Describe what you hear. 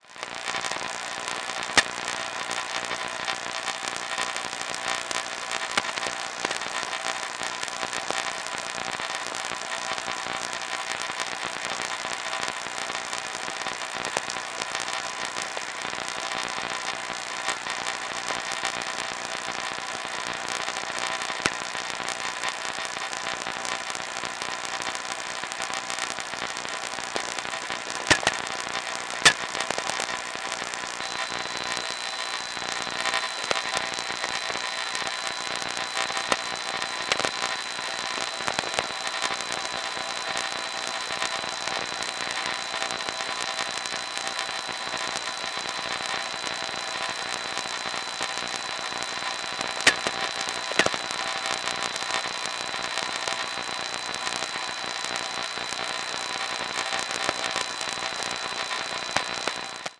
inspire 06Dec2007-07:00:01
offers a public continuous source of audible signal in VLF band direct of our ionosphere.
In this pack I have extracted a selection of fragments of a minute of duration recorded at 7:01 AM (Local Time) every day during approximately a month.
If it interests to you listen more of this material you can connect here to stream:
electronic, noise, radio, shortwave, static, vlf